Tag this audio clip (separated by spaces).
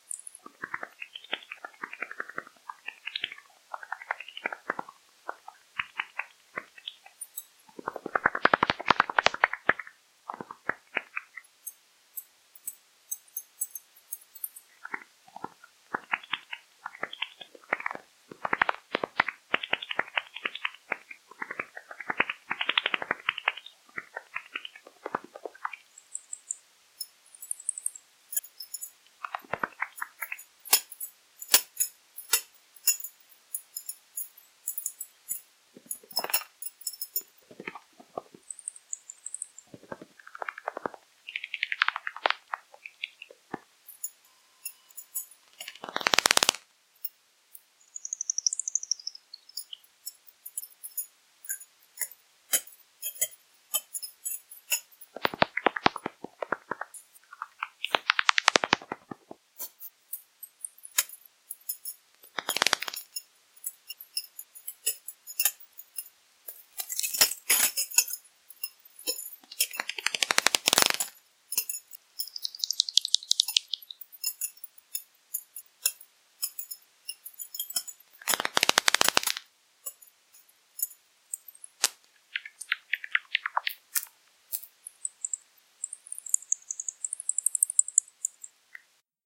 Bats Parkland-Walk Wildlife